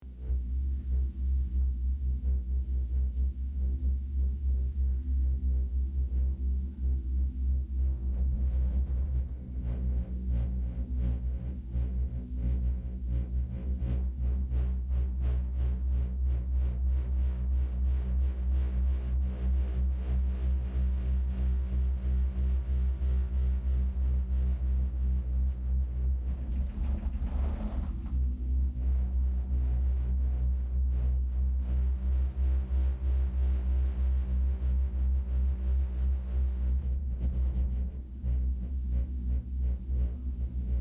chair lift2
Sound from inside a mountain chair lift.
chair-lift, glacier